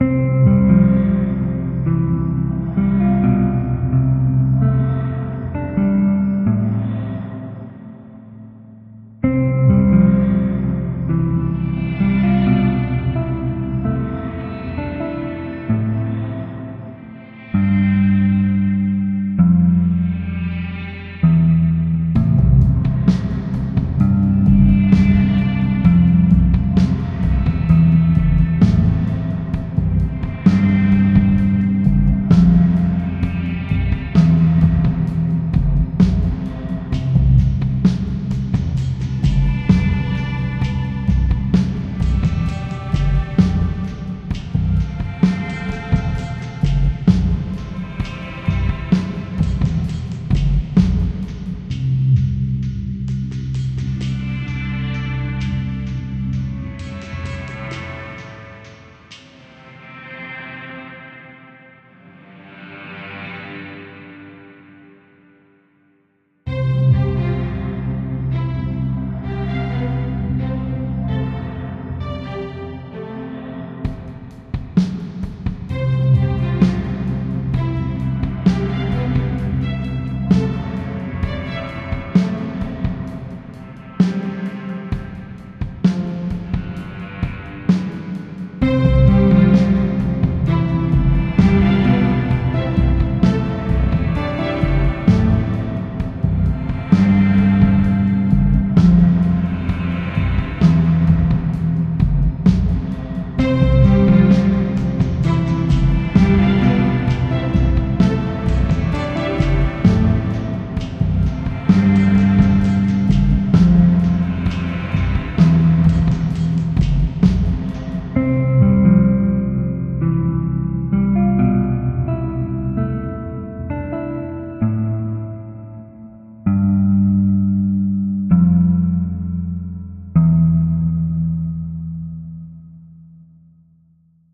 Emotional Original Soundtrack - LAST DAYS
An original composition I made in Fl Studio a really while back and forgot about it. It's different from other tracks and has a lot of reverb. Hope you like it :)
drums, chill, beautiful, dramatic, film, movie, fantasy, post-apocalyptic, original, strings, emotional, guitar, piano, apocalyptic, drama, soundtrack, adventure